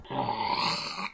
The sound of a small or medium sized creature roaring. Was made using Laptop Microphone, and recorded using Audacity.
Recorded 28/3/2013
angry, animal, creature, monster, roar